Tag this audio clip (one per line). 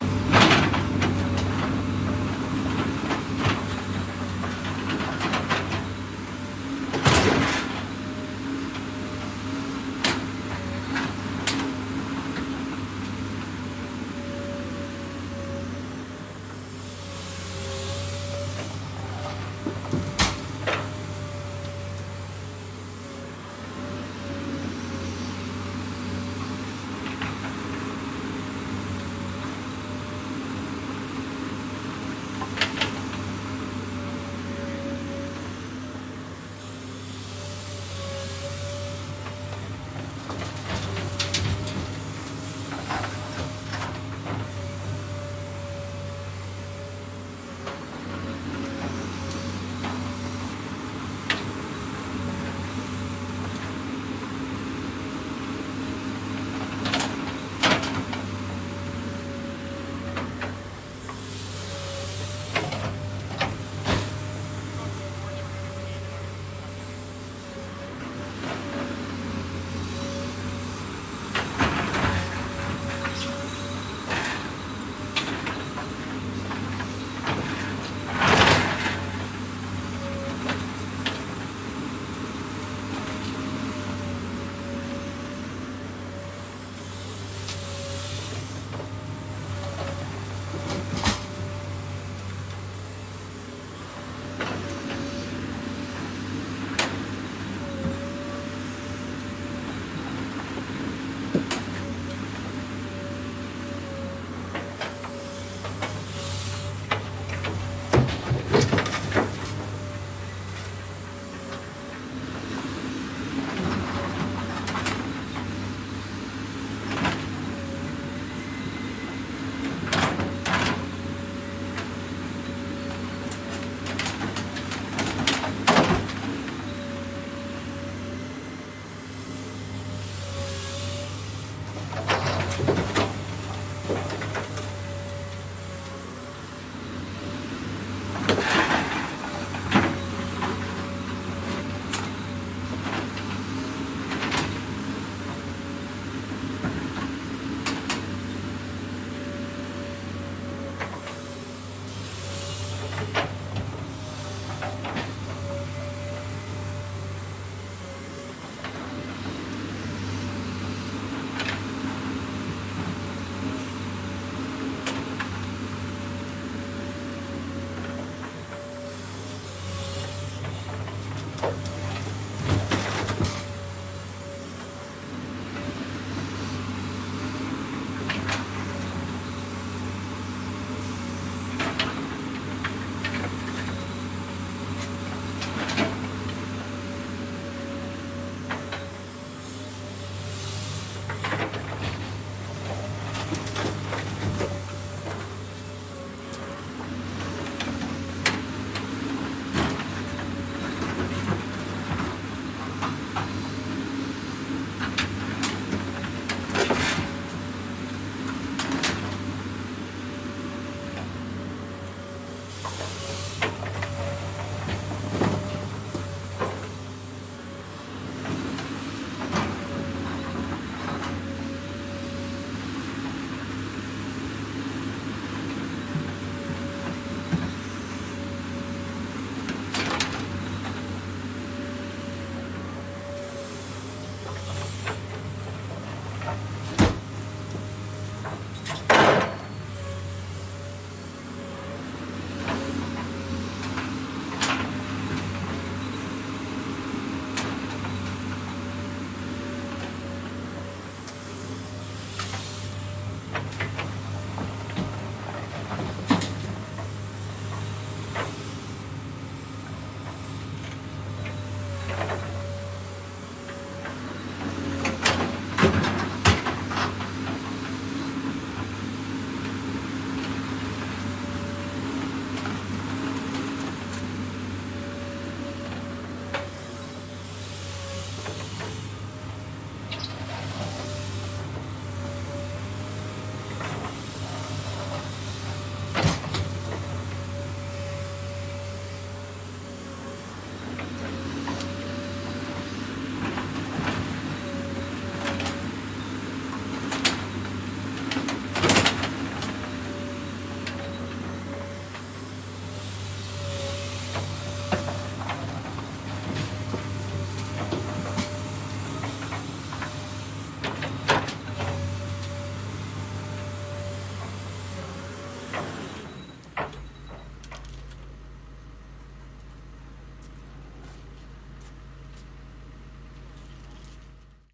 Equipment
Road
digger
excavator
machine
machinery